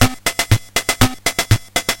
"disco" drum pattern from Yamaha PSS-170 keyboard
yamaha, 80s, portasound, disco, pss170, retro